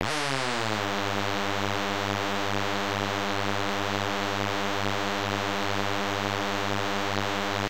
fake hoover5
Fake hoover with detuned waves
8-bits, detuned